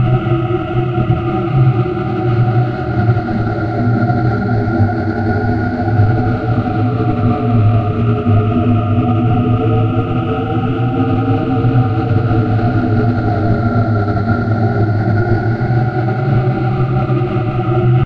Cinematic source layer as a seamless loop for any setting with continuous high wind (unusually strong), providing some varying "whistle" peaks in pink noise already modulated and processed for large-scale (wide-view) purposes. The effect is totally synthetic, created in Cool Edit Pro, staring with at least two layers of noise each independently filtered with morphing FFT peaks, pitch shifts, chorus, diffusive reverb, etc., and partly self-modulated with a distorted version of the low-frequency components, and finally band-passed very gently (there is still plenty of low frequency if you want to focus on that). Suggested use is to apply further filtering to match the need, and layer with other ambient sound effects as needed (including flutter or other wind-caused effects).
hurricane, wind, ambient, whistle, scream, gale, rumble, synthetic, atmosphere, background, loop, noise